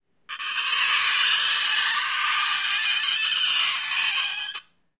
Fork scraping metal 6
Fork scraping metal sound, like nails scraping sound
fork, metal, nail, pain, plate, scrap, scrape, scraping, steel